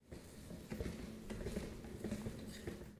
This audio represents when someone pushes a Market Car.